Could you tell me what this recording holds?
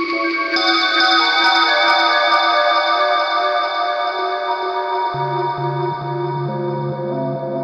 part of dark fancy texture #2
texture, ambience